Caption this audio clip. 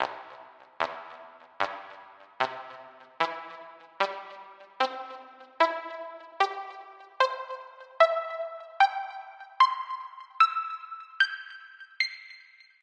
Just some more synthesised bleeps and beeps by me.
beep, computer, sci-fi